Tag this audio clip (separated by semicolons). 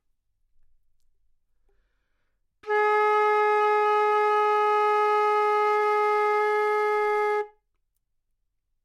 good-sounds; flute; Gsharp4; neumann-U87; single-note; multisample